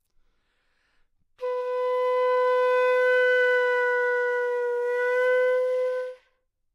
Flute - B5 - bad-stability-pitch
Part of the Good-sounds dataset of monophonic instrumental sounds.
instrument::flute
note::B
octave::4
midi note::59
good-sounds-id::3142
Intentionally played as an example of bad-pitch
flute, multisample, good-sounds, single-note, neumann-U87, B4